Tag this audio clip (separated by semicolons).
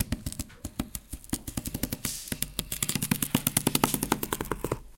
scrape; scratch